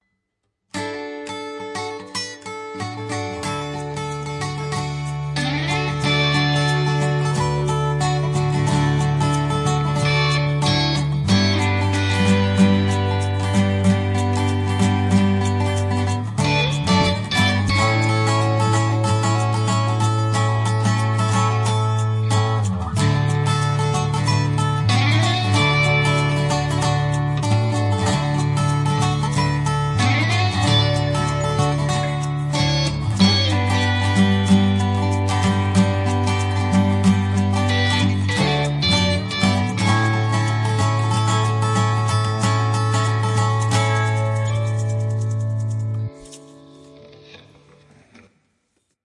Guitar and bass
ambient, bass, free, guitar, maracas, melody, music, song, synth